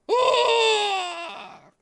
Male Death 03
Recorded by mouth
die, male, man, speech, death, human, vocal, voice, scream